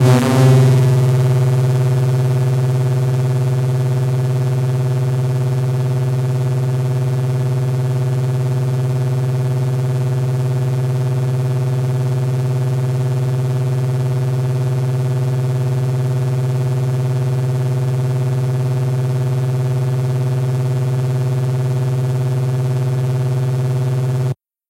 Space craft or ufo sound, could be used for game sounds.